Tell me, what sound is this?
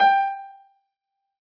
Piano ff 059